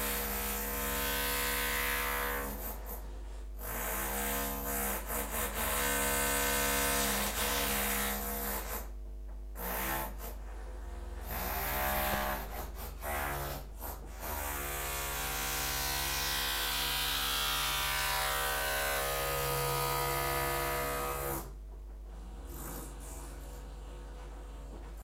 Sounds of power drill